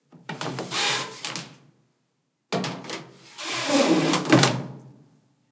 Dryer lint screen
The lint screen being pulled out of my dryer and re-inserted. Slowing it down to half speed makes it sound like heavy machinery coming to a halt.
insert, remove, machine, appliance, clunk